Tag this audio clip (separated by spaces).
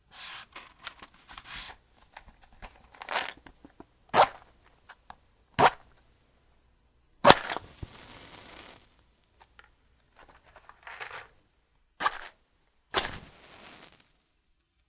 burning light matches